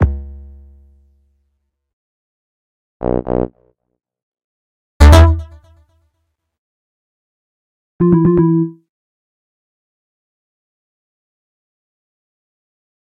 UI Sounds
These are a bunch of sound effects made with the intention they will be used for a video game's menu, or a similar user interface.
These sounds were made in Reaper, using the Synth1 plugin.
These sounds are part of a college project, but are usable by anyone.
game, synthesis, VST